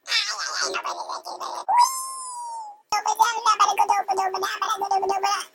High pitched abstract sound. Human made. Invokes images of a small critter or alien.
abstract, small, human, critter, alien, creature